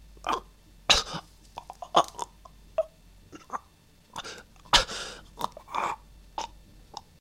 Male Choking: a young adult male coughing, choking and struggling to breathe while being choked.
This was originally recorded for use in my own project but I have no issues with sharing it.